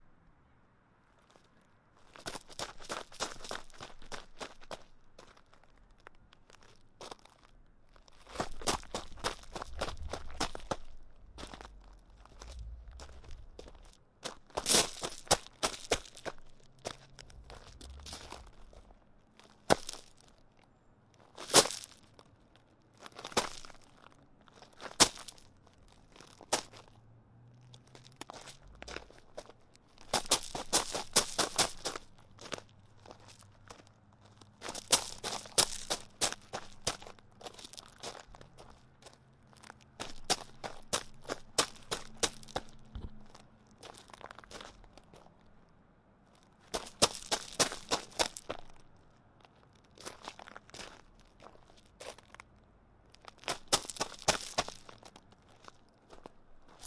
Rapid Footsteps Upon Gravel
Some fast-paced footsteps upon gravel that give the impression of sprinting. Would be useful for foley of someone running.
Recorded using a RODE VideoMic Go into a Zoom H1 recorder.
footsteps, gravel, quick, rapid, running, steps